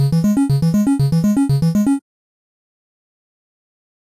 8 bit arpeggio 001 minor 120 bpm triangle 014 Cis2
120, 8, 8-bit, 8-bits, 8bit, atari, bass, beat, bit, bpm, drum, electro, electronic, free, game, gameboy, gameloop, gamemusic, loop, loops, mario, music, nintendo, sega, synth